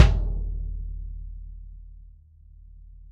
BD22x16-MLP-O~v15
A 1-shot sample taken of an unmuffled 22-inch diameter, 16-inch deep Remo Mastertouch bass drum, recorded with an internally mounted Equitek E100 close-mic and two Peavey electret condenser microphones in an XY pair. The drum was fitted with a Remo suede ambassador batter head and a Remo black logo front head with a 6-inch port. The instrument was played with a foot pedal-mounted nylon beater. The files are all 150,000 samples in length, and crossfade-looped with the loop range [100,000...149,999]. Just enable looping, set the sample player's sustain parameter to 0% and use the decay and/or release parameter to fade the cymbal out to taste.
Notes for samples in this pack:
Tuning:
LP = Low Pitch
MLP = Medium-Low Pitch
MP = Medium Pitch
MHP = Medium-High Pitch
HP = High Pitch
VHP = Very High Pitch
drum, velocity